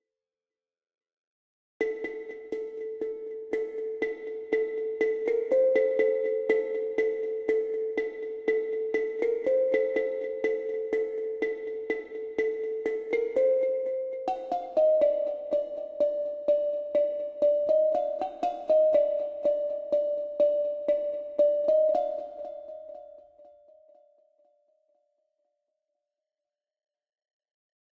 Mistery keys
Short mistery melody
enigma, keys, loop, mystique